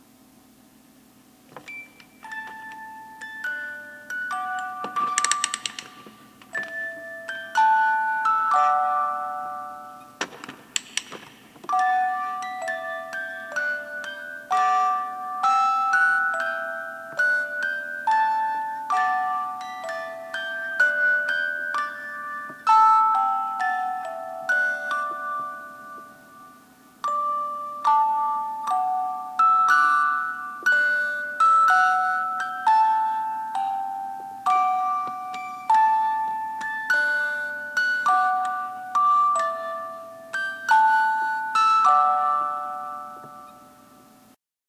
This is a recording of a 1960 windup fisher-price fake TV toy that played London bridges and row row your boat. I think I recorded it with a 1960 shure condenser mic. One of those old timey radio looking things.
childrens, song, kids, toy, windup